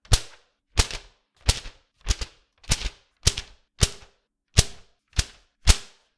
Unfolding a piece of computer paper quickly in front of a Samson Meteor USB microphone.